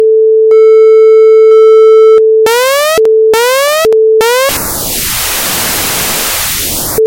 With this sound I create an emergy soundtrack, we can hear a bip of electrocardiogram, and then an alarm siren.